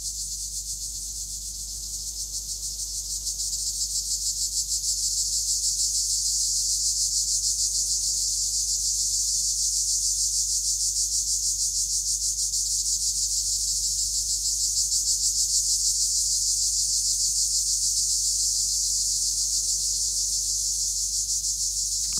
Crickets+sea-1
Recorded with Zoom H2N on a vacation on Cyprus.
Beach, Cyprus, Ocean, Sea, Waves, coast, field-recording, people, seaside, shore, water